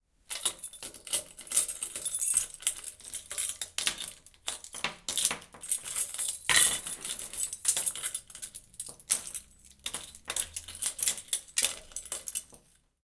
Sound of keys. Sound recorded with a ZOOM H4N Pro.
Son de clés. Son enregistré avec un ZOOM H4N Pro.